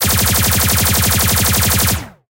blaster shot 10 2(Sytrus,rsmpl,3lrs,multiprcsng)series
Sounds of shots from sci-fi weapons. Synthesis on the Sytrus synthesizer (no samples). Subsequent multi-stage processing and combination of layers.
Almost all of the serial shot sounds presented here have a single option
(see the mark at the end of the file name), so that you can create your rate of fire, for example using an arpeggiator on one note. At the same time, do not forget to adjust the ADSR envelopes, this is very important in order to get the desired articulation of a series of shots. Single shots themselves do not sound as good as serial shots. Moreover, it may seem that the shots in the series and single, under the same number do not correspond to each other at all. You will understand that this is not the case when setting up your series of shots, the main thing, as I said, you need to correctly adjust the ADSR. May be useful for your work. If possible, I ask you to publish here links to your work where
these sounds were used.